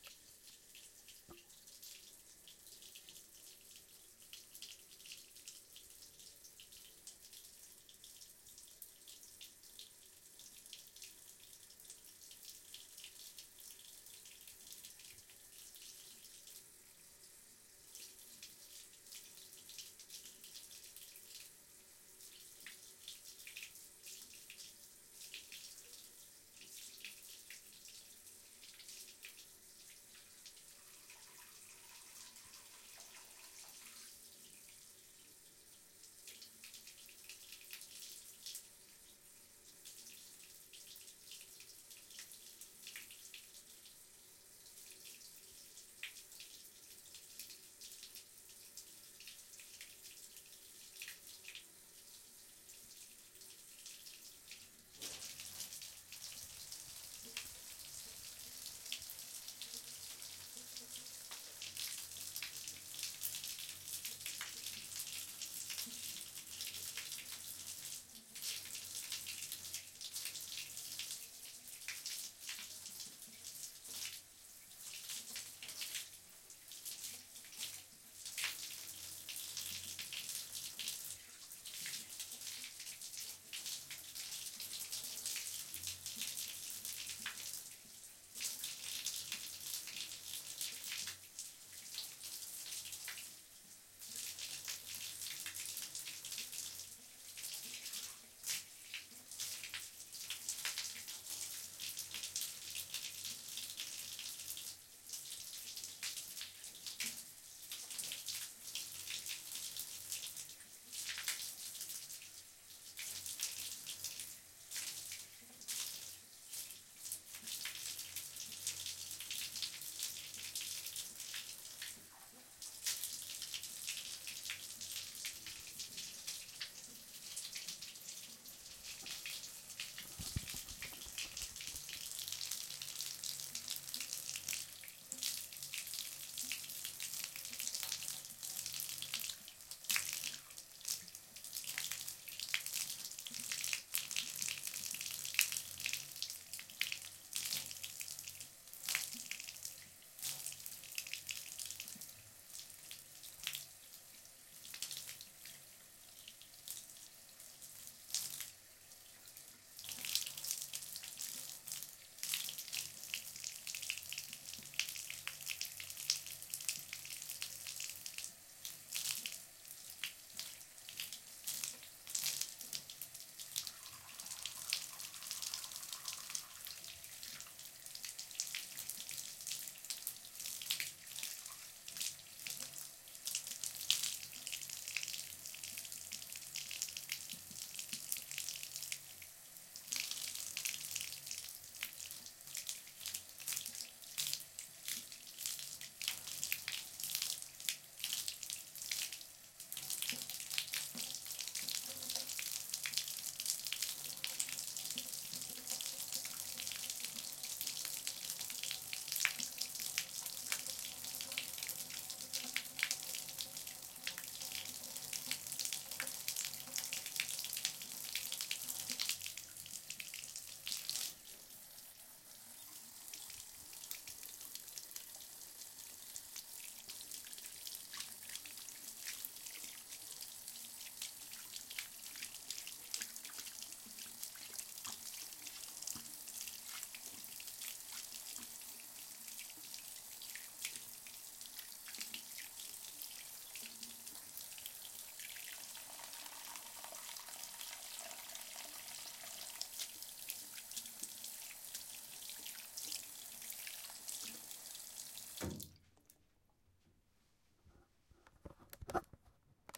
I recorded this with my Zoom H2. Held the recorder the wrong way at first so for the first half of the recording you hear more reverb than direct sound, then realised my mistake and turned it the right way. I made some splashes with my hand so that it doesn't sound too monotonous. Some of the sound is water running into the bath, some - into the sink, I'm sure you can hear which is which. Hope you find it useful for whatever purpose you might need it.